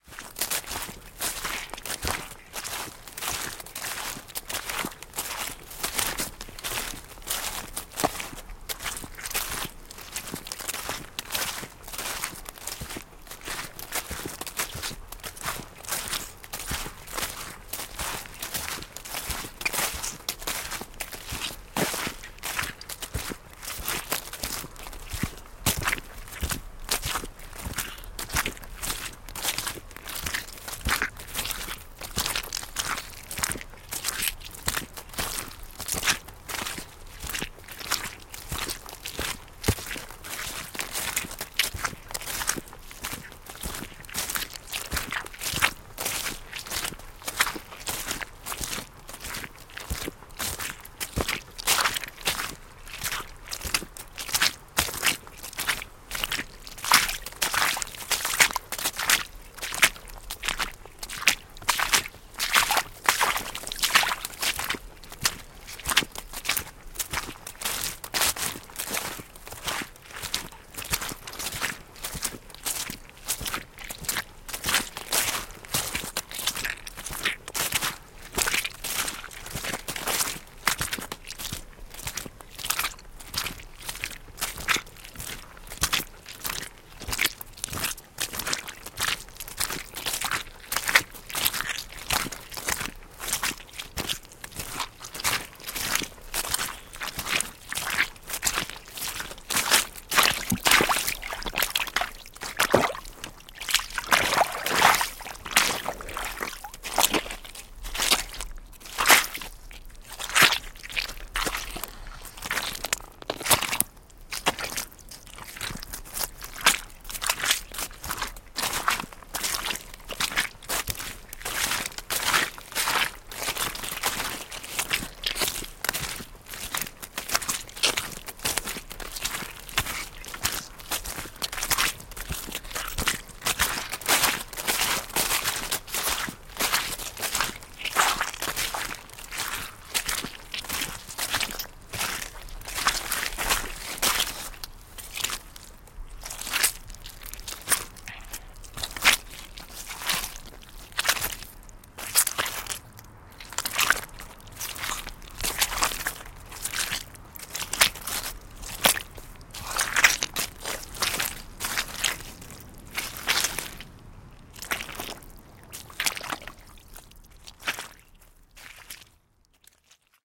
steps on wet area
Steps with rubber boots on a wet field.
Close up.
Differents soils, wet field, puddle, path with fallen leaves.
France jan2023
Recorded with schoeps cMC6 MK41
recorded on Sounddevice mixpre6
boots, countryside, feet, field, Field-recording, footstep, footsteps, meadow, rubber, soil, step, steps, walk, walking, water, wet